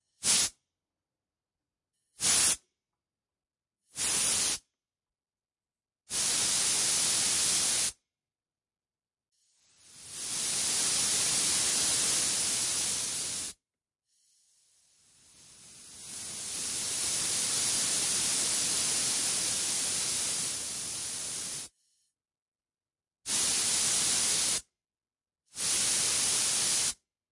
FX-high pressure air-090720
High pressure air. Different lengths. Tascam DR-100.
air, blow, fx, high-pressure-air, noise, wind